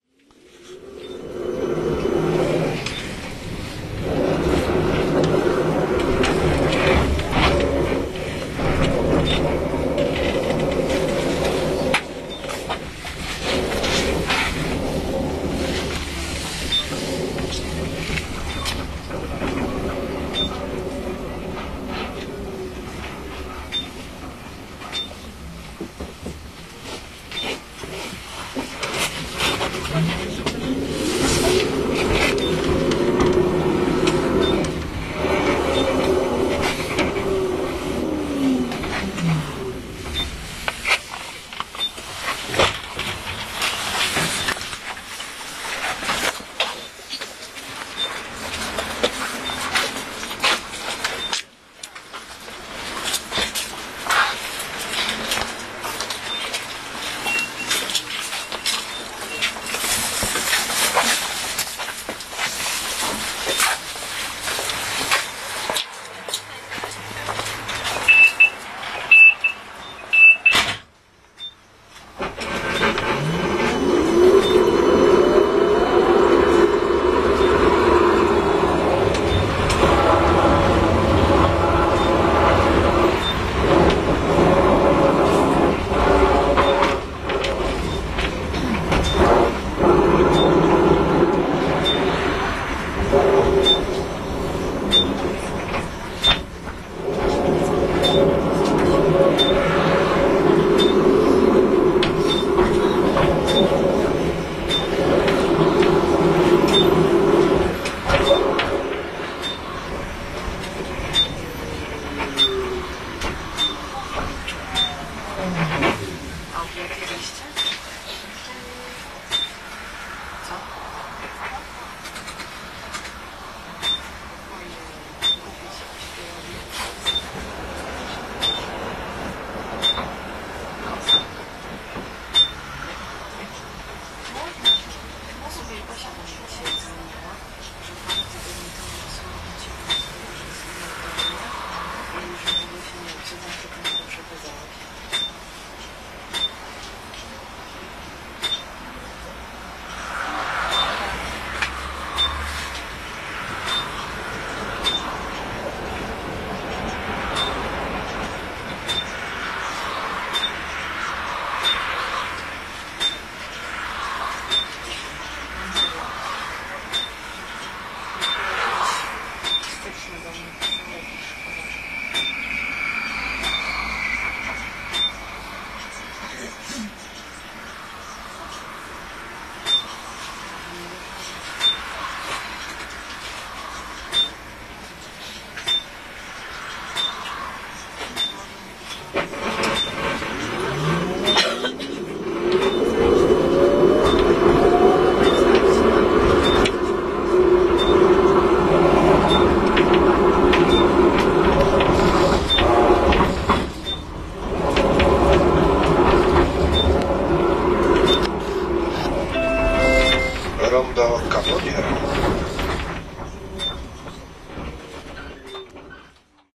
24.01.2011: about 19.00. inside the fast tramway number 12 between two tram stops. buzzing of engine, beeping of broken validating machine, clicks and scraps made by people. Poznan on Roosvelta street.
beeping, scraping, scrape, tramway, clicks, validating-machine, field-recording, people, inside